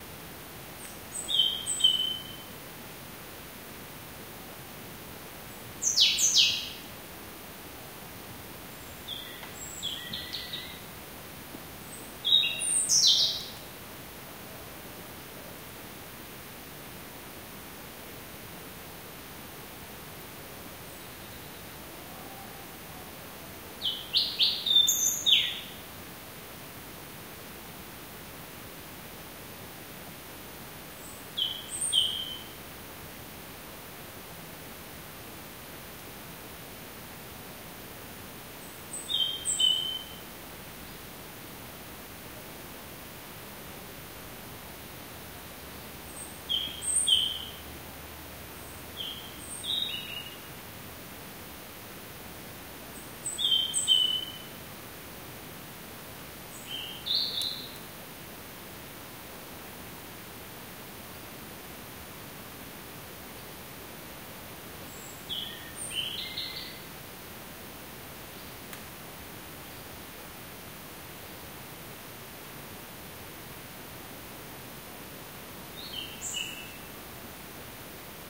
Forest ambient afternoon
A calm afternoon in a forest on Tenerife, Spain in December. Recorded with an Olympus LS-12 and a Rycote windshield.
wind, nature, canaries, winter, insect, autumn, bird, field-recording